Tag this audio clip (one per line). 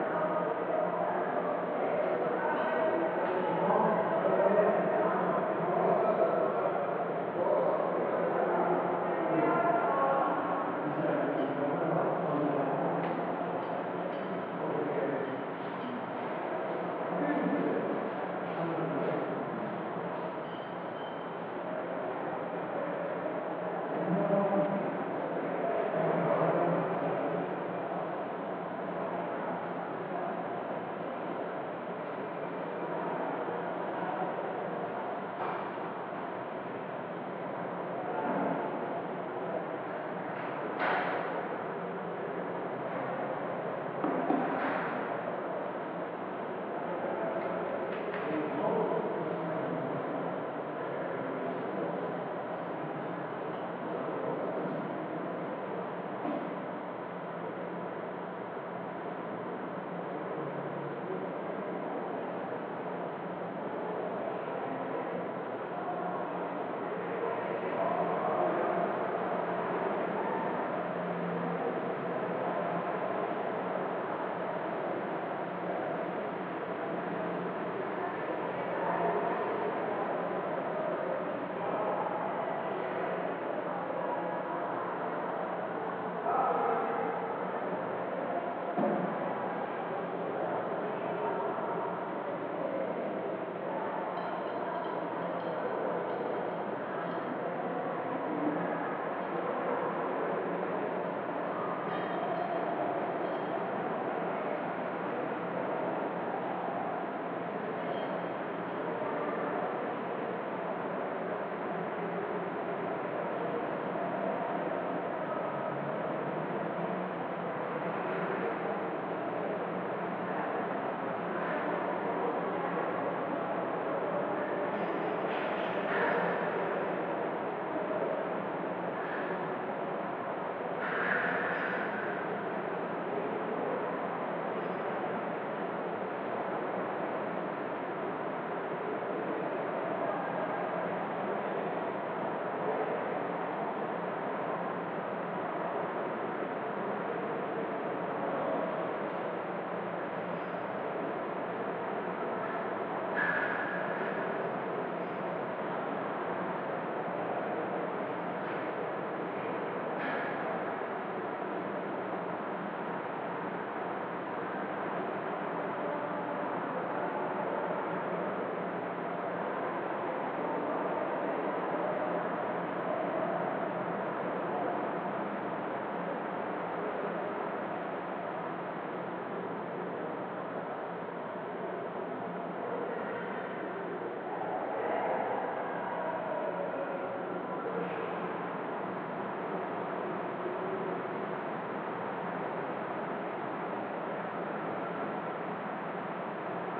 ambience
background
field
general-noise
people
recording
soundscape